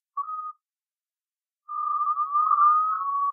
182776 18hiltc gun-being-loaded Isolated
Also brought the level up by 20dB. It almost sounds like a bird in the background.
anomaly, unknown, whistling, weird